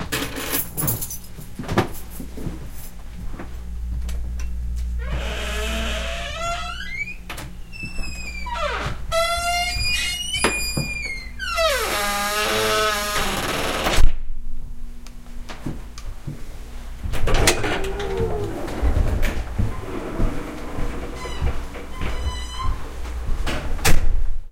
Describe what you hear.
Door Sequence
door, sequence